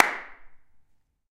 Pack of 17 handclaps. In full stereo.